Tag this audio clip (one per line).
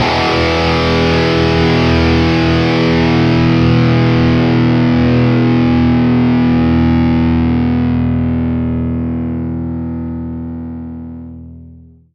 Distortion
Electric-Guitar
Melodic